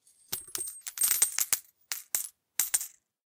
Coins - Money 02
Pop some tissue and a woolly hat in a bowl, pop that in the sound booth next to the mic and let your coins drop. Then edit that baby - cut out the gaps that are too far apart until the impacts of the coins land at the time you want.
coin, Coins, drop, hit, Money, pop